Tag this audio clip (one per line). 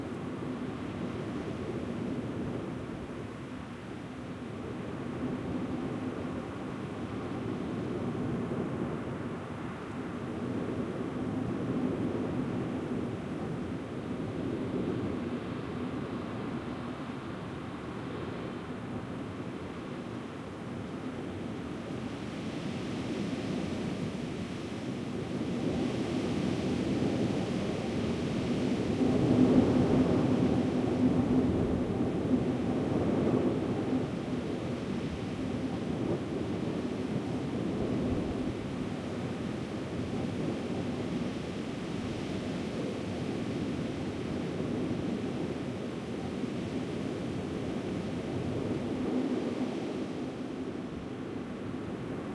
ambiance
ambience
background
blowing
looping
soundscape
turbine
wind
wind-turbine